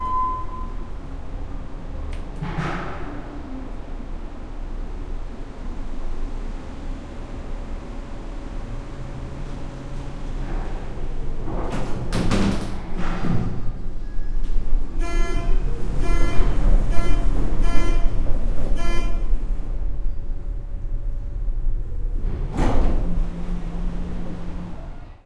I recorded going down a few levels in an elevator with my Tascam DR-05.